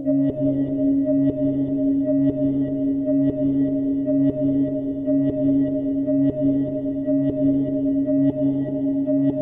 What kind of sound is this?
Pondering Something You're Unsure In a Dream
This is a single track of a song I wrote, the feel to it is one of uncertainty. Good for cinema, more serious than frivolous.
anxious, confused, dream, ponder, pondering, uncertain, unsure